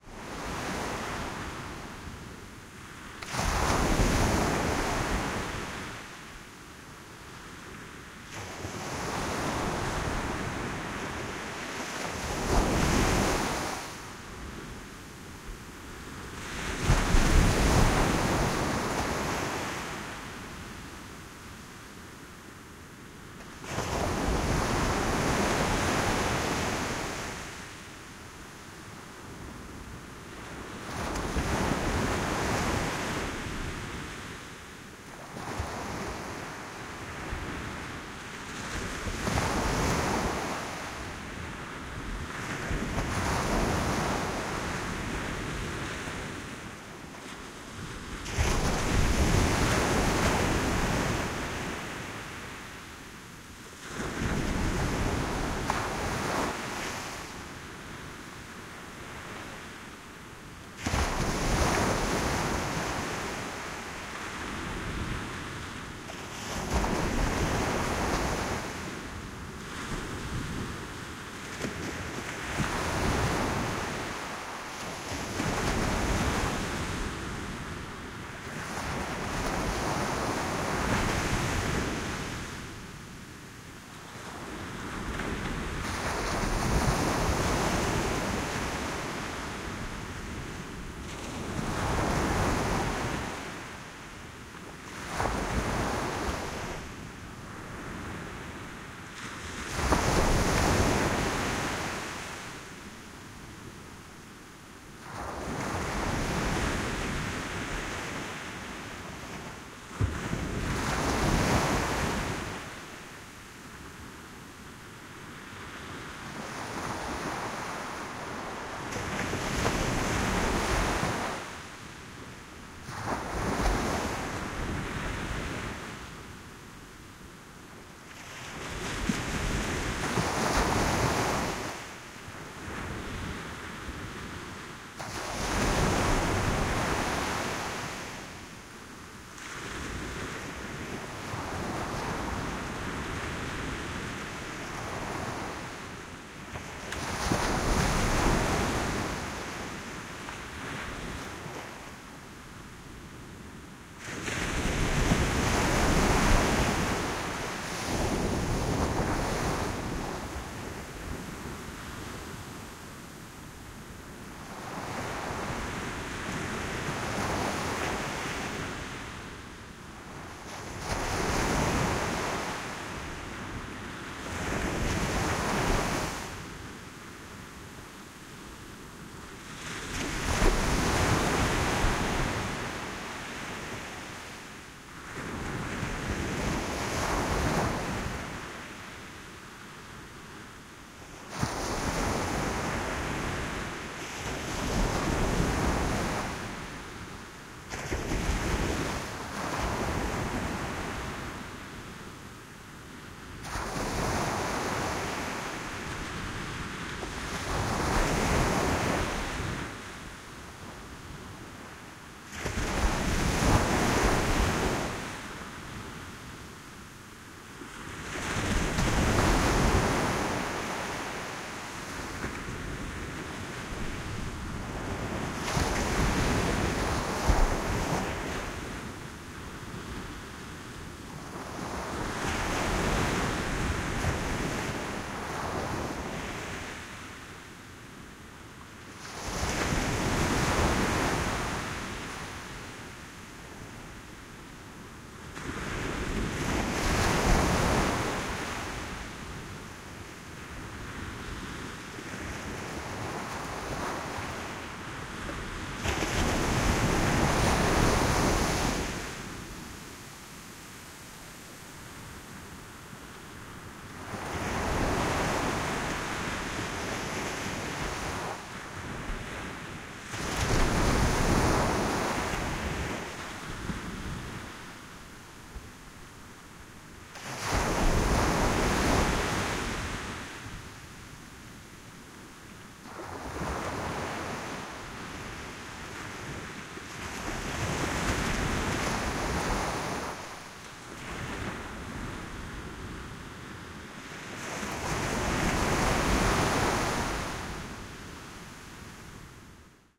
Stereo ambient field recording of the sandy shore break at Kua Bay on the Big Island of Hawaii, made using an SASS